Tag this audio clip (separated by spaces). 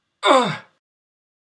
scream exclamation hurt male pain effect grunt hit yell